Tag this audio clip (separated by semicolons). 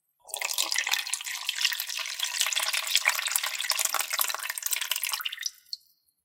plastic; water